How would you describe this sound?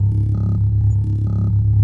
Tuppy64ths-2
I recorded multiple hits on a largeish piece of Tupperware, found the good notes, arranged them into this crazy sound. Pans back and forth from left to right seamlessly if you loop it.
130bpm, Tupperware, weird